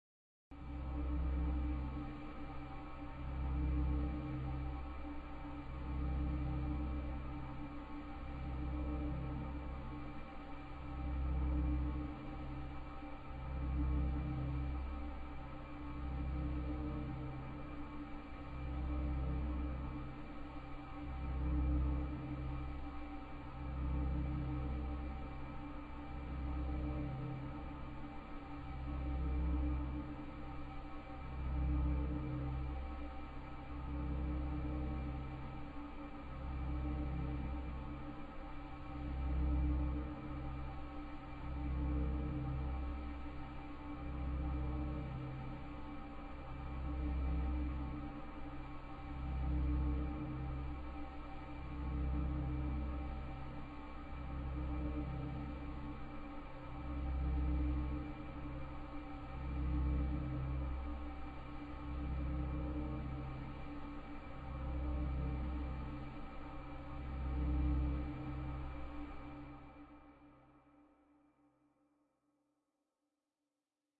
A soft engine or machine of some sort. Syhthesized with VCV rack.
Machine, Sci-Fi